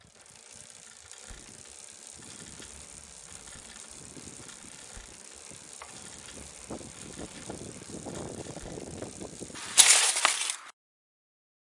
Low Speed Skid Crash OS
Mountain-Bike Crash Skid
Crash, Mountain-Bike, Skid